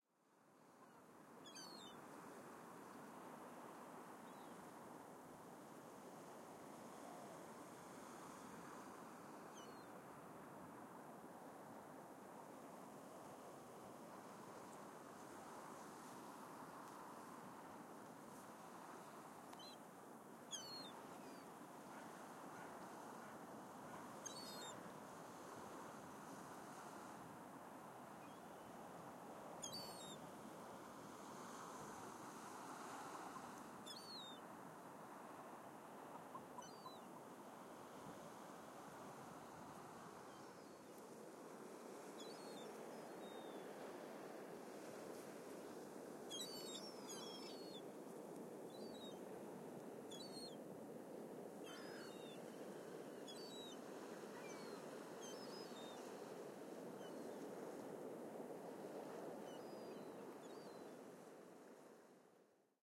Recording of the sandy sea shore in the Netherlands, with a High-Pass filter for wind. I fed some seagulls in the hope they would scream, which they did.
waves, wind, water, northsea, sandy, netherlands, scheveningen, seagull, sea, seagulls, wave, shore